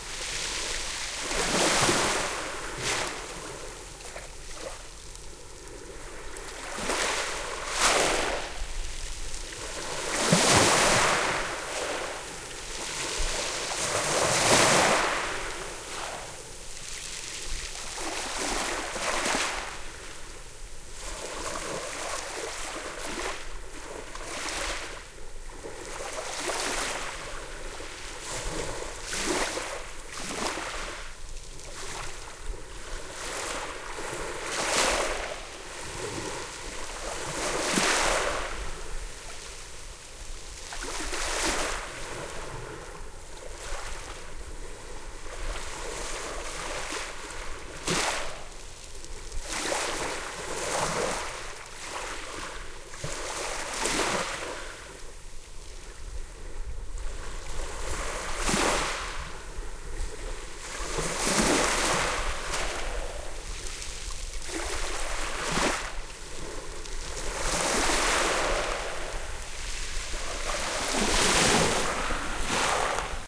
20080808.wawes.pebbles.closeup
Closest take of soft waves splashing on the pebbles of a beach in Gaspé Bay, Quebec Canada. I could not place the mics closer (without being reached by the waves), the detail of pebbles moving can be heard. Recorded with two Shure WL183 capsules into a Fel preamp and Edirol R09 recorder
beach, field-recording, nature, splashing, water, wave